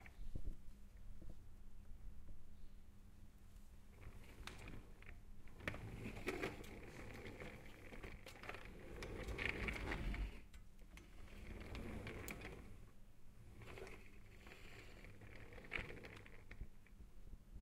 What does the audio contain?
This is of someone pushing a metal serving cart on a wooden floor in various ways.